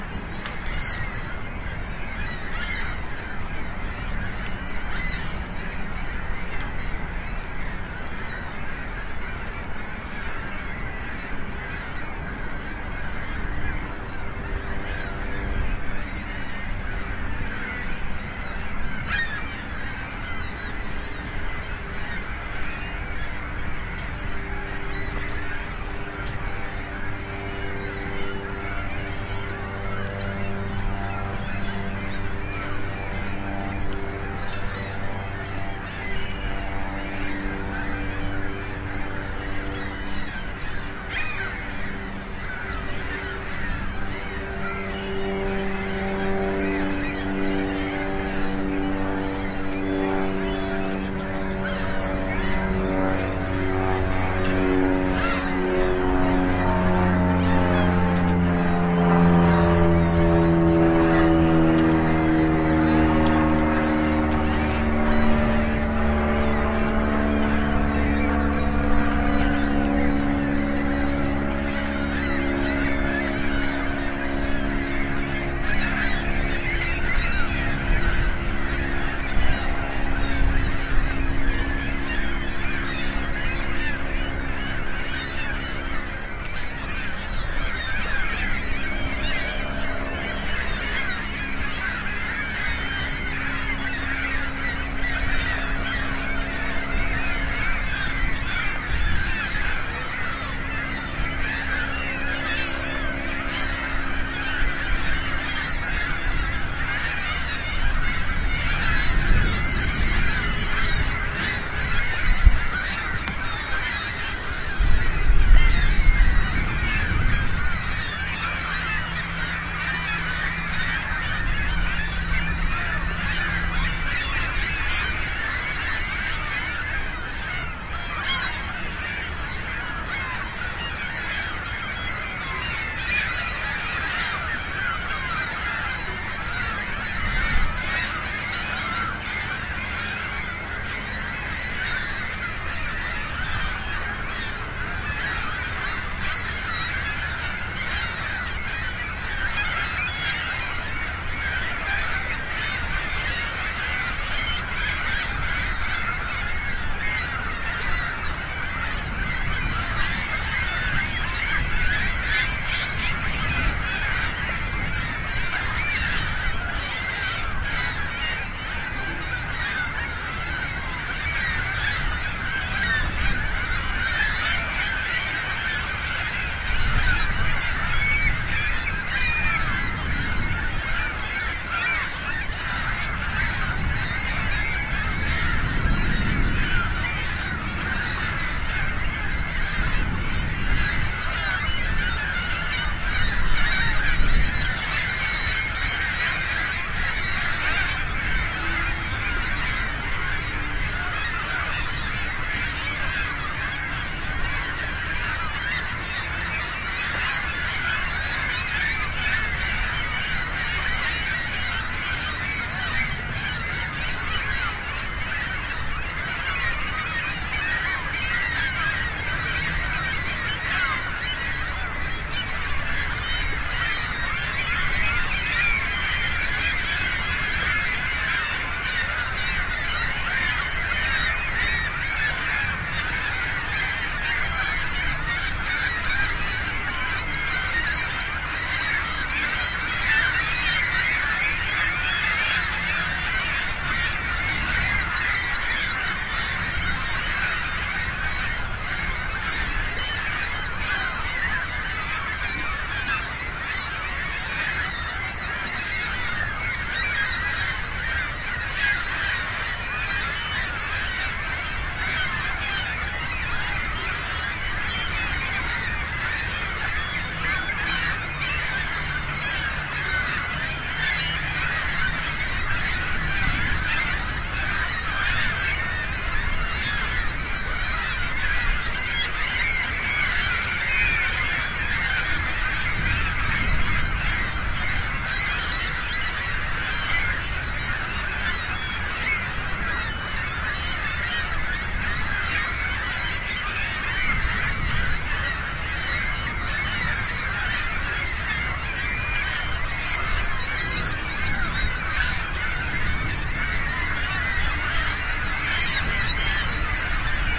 Large Group of Seagulls on Pier
recorded on a Sony PCM D50
xy pattern
Group, Pier, Seagulls